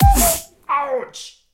Multi layer kick